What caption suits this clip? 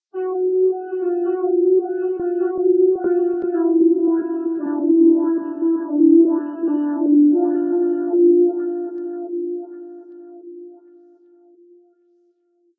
Patch #?? - I added wah to Organ C2 and got this jazzy kind of thing. Quiet a bit less serious compared to the others in this set. >> Part of a set of New Age synths, all made with AnologX Virtual Piano.
ambient, loop, sad